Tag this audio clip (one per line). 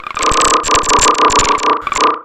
glitch
random